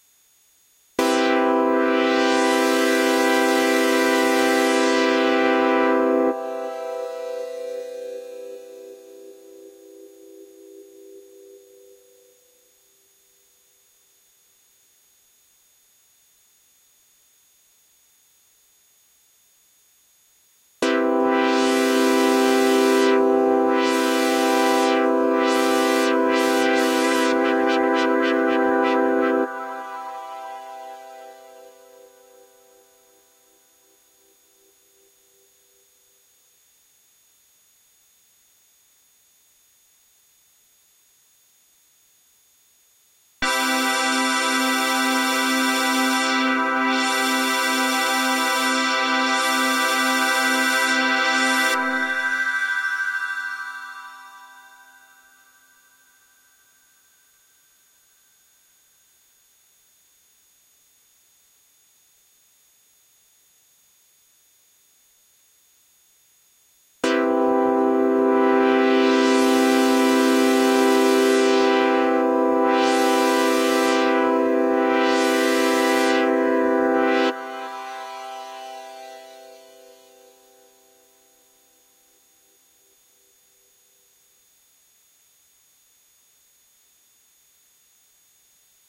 Phone Notifications
Synth, Notification, Phone, Sound, GarageBand, FX